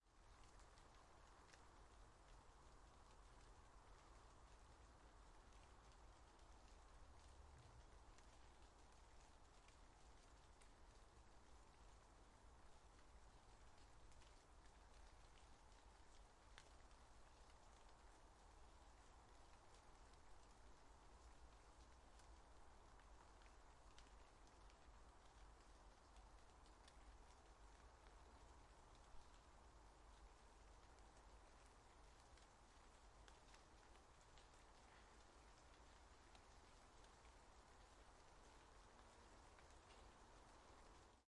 An ambient and peaceful recording of light rain, recorded in the woods at nighttime.